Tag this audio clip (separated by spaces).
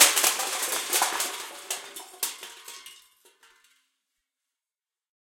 bin bottle c42 c617 can chaos coke container crash crush cup destroy destruction dispose drop empty garbage half hit impact josephson metal metallic npng pail plastic rubbish smash speed thud